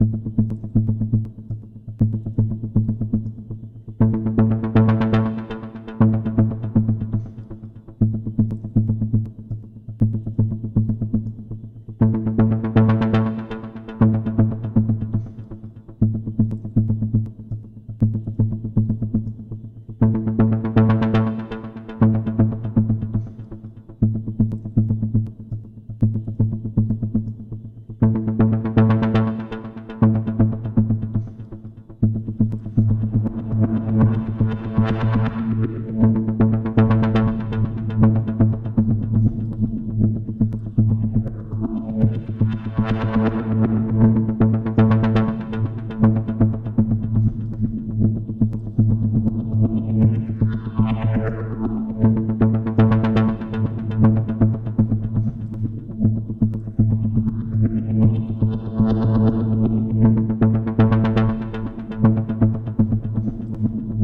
Rhythmic synth pulses
square-wave, pulses